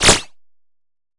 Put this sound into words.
Attack Zound-00
A short electronic laser shotgun. This sound was created using the Waldorf Attack VSTi within Cubase SX.
electronic, soundeffect